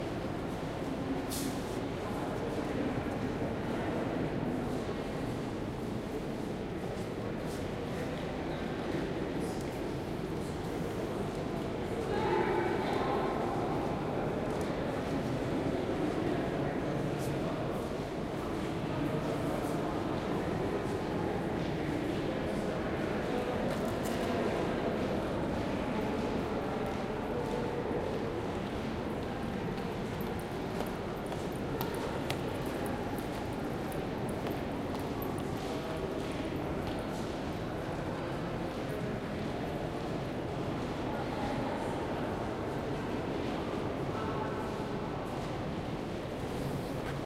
Central staircase in Louvre with sound of visitors.